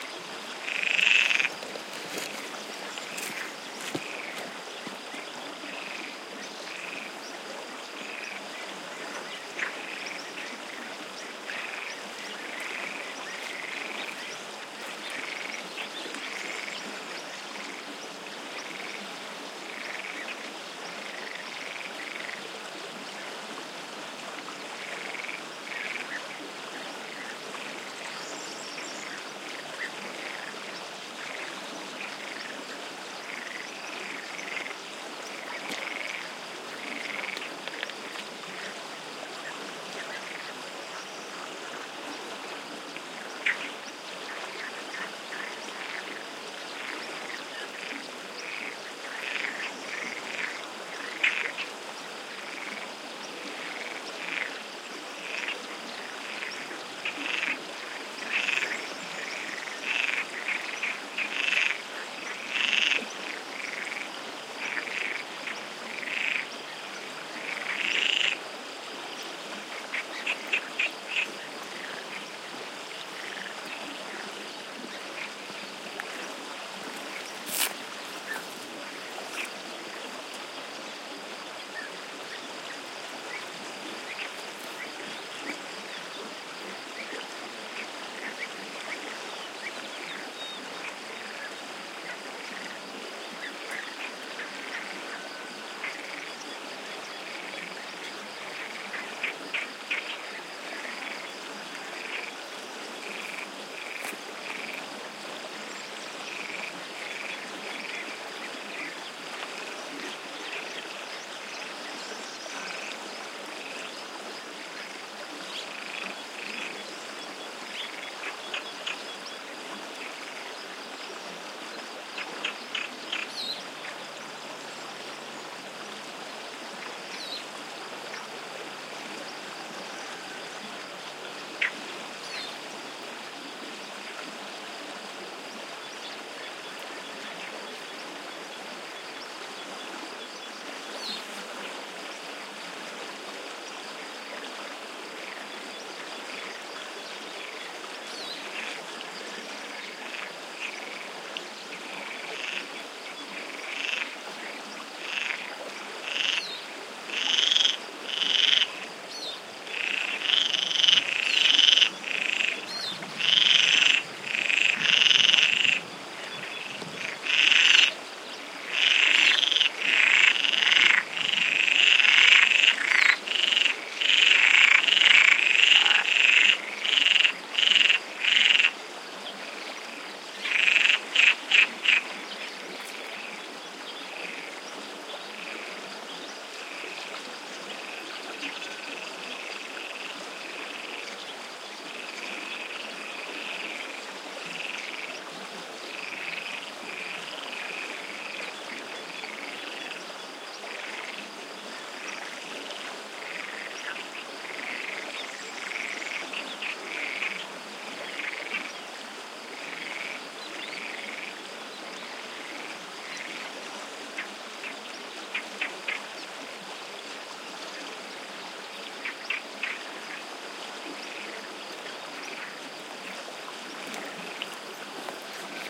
Mid-day ambiance near a stream at Povoa e Meadas (Castelo de Vide, Alentejo, Portugal), with frogs, birds and crickets. Audiotechnica BP4025, Shure FP24 preamp, PCM-M10 recorder.
stream, mediterranean-forest, field-recording, frogs, Alentejo, ambiance, spring, Portugal, river, water, croacking